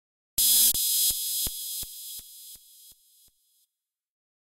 I used FL Studio 11 to create this effect, I filter the sound with Gross Beat plugins.
fxs, future, digital, lo-fi, sound-effect, computer, electric, robotic, sound-design, fx, freaky